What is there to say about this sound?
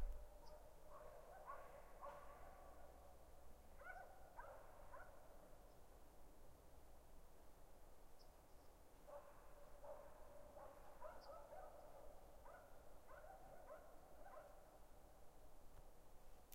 Dogs in Distance
Hailuoto/ Finland, distanced dogs
dogs, sonic-snaps